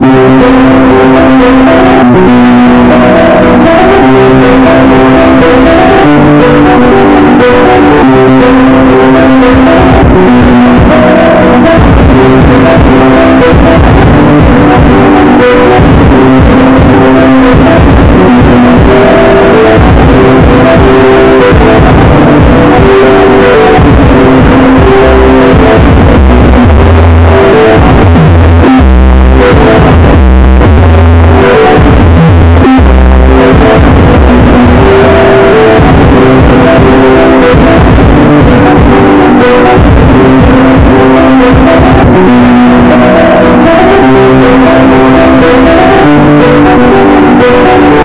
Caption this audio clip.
LOUD!!!
so basically i edited my most popular song, sunrise, until i killed it. yes.